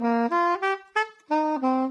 Non-sense sax. Recorded mono with mic over the left hand.
soprano-saxophone, soprano-sax, melody, loop, soprano, saxophone, sax